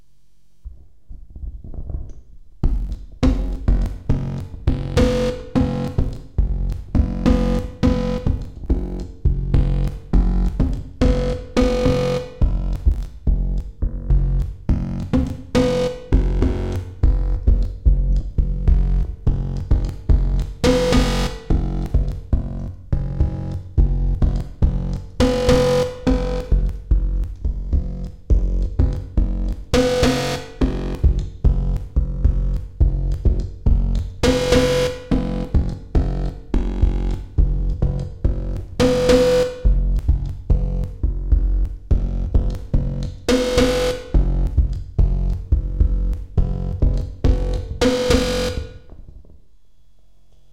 microphone beat
Vocoder processing through a microphone. This loop was made by using the mic as a drumstick.
beat, buzz, loop, microphone, vocoder